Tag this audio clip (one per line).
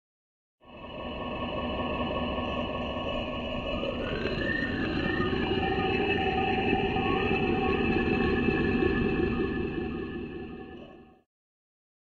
fx
singing
stone